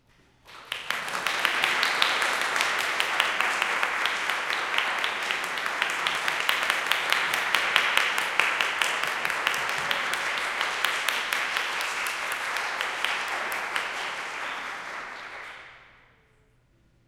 Polite applause from a small audience at a classical recital. Lots of natural reverb.